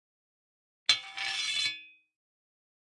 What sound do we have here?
Sliding Metal 10
slide, metal, clang, shiny, metallic, iron, rod, steel, blacksmith, shield